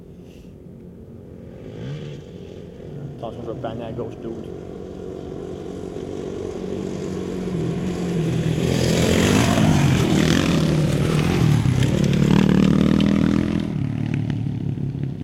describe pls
motorcycle dirt bike motocross pass by medium speed and jump dirt ramp